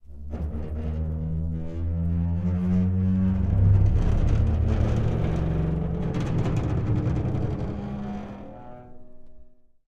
metal gate 05
Large metal gate squeaks rattles and bangs.
bangs, gate, squeaks, metal, rattles, large